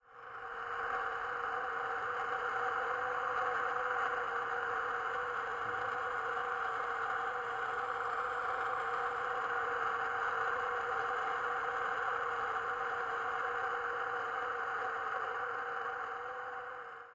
Wind Through a Pipe
Wind escaping from an underground pipe.